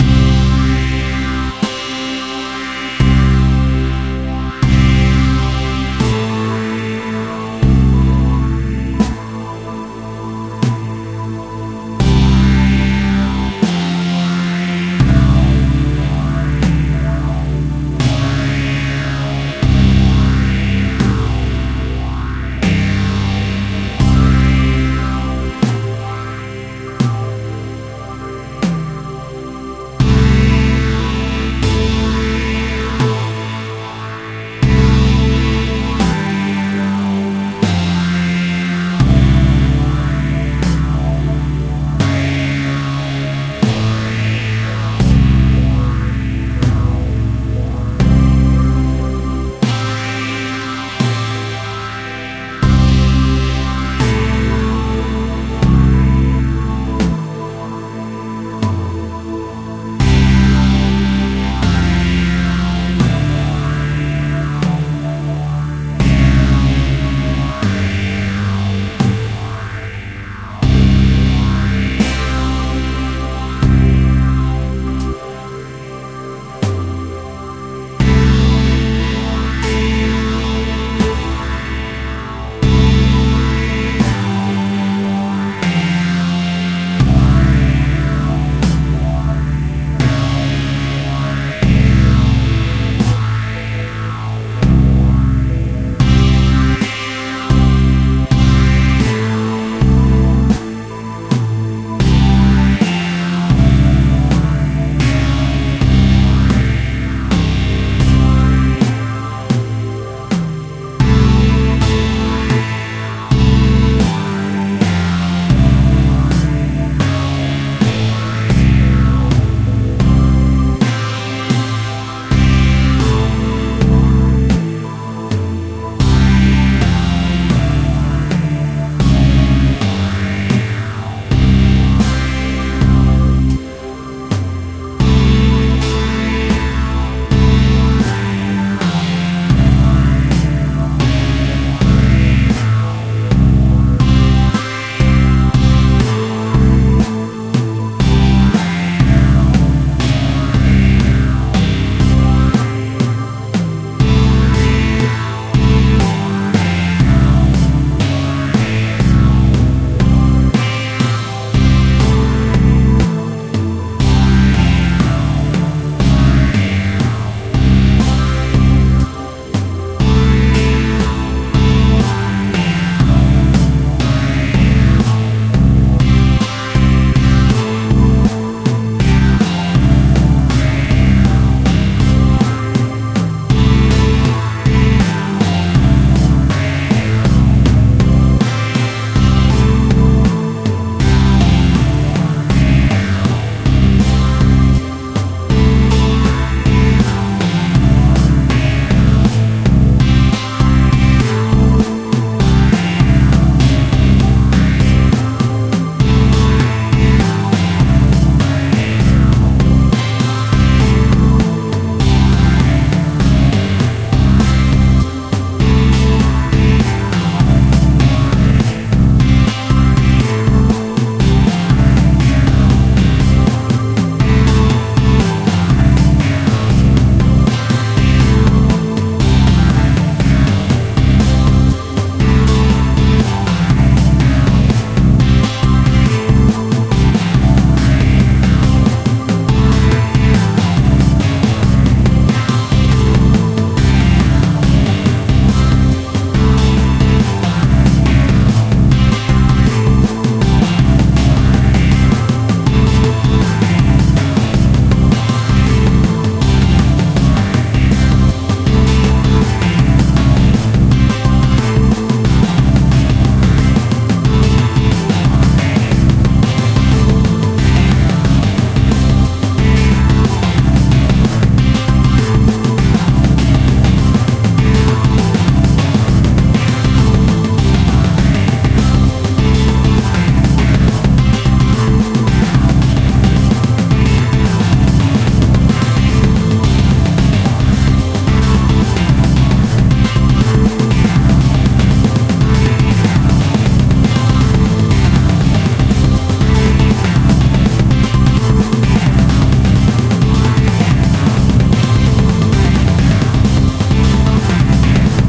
Same beat, slow (10BPM) to fast (130BPM)
Made in FL Studio
Drums + Synths